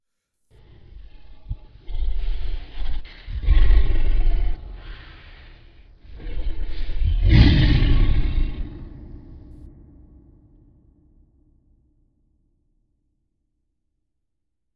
Kong Roar complete
A monster roar I was inspired to do after watching the new Kong movie.